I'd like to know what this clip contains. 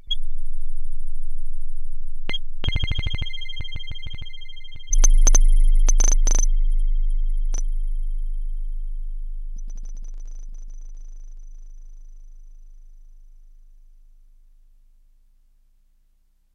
nord glitch 014
Some weird beeps and clicks and bloops created from a Nord Modular synth.